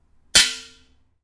Knife Hit Pan Filled With Water 5
clang, metal, hit, impact, strike, metallic, knife, pan